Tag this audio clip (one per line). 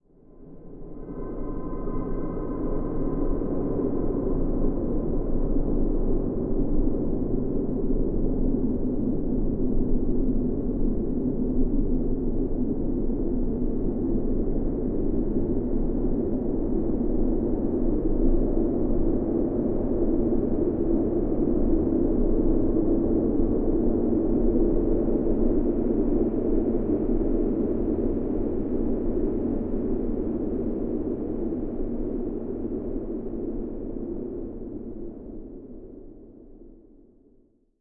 ambient
atmo
atmosphere
cinematic
creepy
dark
deep
drama
dramatic
drone
effects
experimental
film
flims
game
oscuro
pad
sinister
sound
soundscapes
suspense
tenebroso
terrifying
terror